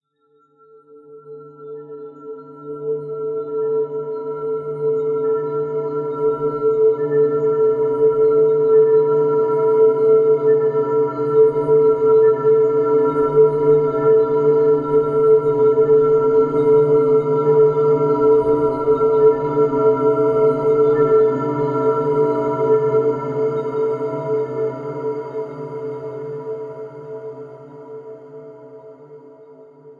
realized with virtual instrument Morphine.
dreamy, artificial, morph, pad, drone, ambient, space